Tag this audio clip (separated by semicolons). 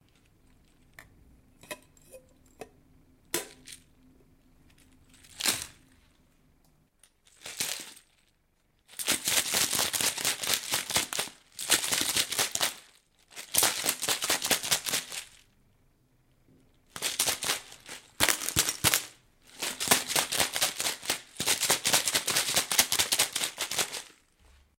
alcohol; bar; martini; Shaker